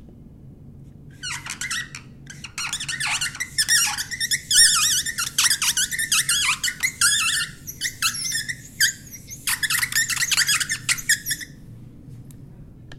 Marker on a whiteboard
marker, white-board, squeaking, office